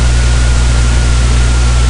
maybe 'thats it'
evp maker
maybe a voice saying
thats it?
I asked anyone to tap or flick my headphone microphone to get some noise audio but I so far found this in the playing back of the audio
possibly a response like
thats it? thats I have to do is tap or flick a microphone?
I'm not a ghost hunter I just test stuff in my room I guess for fun or just cause.
evp, response, thats